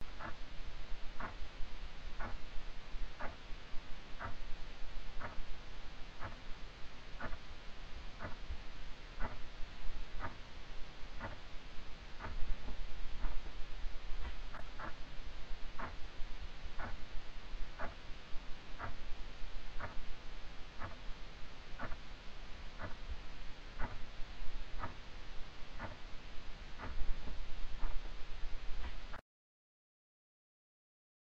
The classic suspense of a ticking clock. Created using a Samson USB microphone, a wall clock and Mixcraft 5.